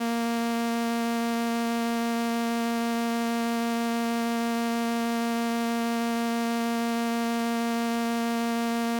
Transistor Organ Violin - A#3
Sample of an old combo organ set to its "Violin" setting.
Recorded with a DI-Box and a RME Babyface using Cubase.
Have fun!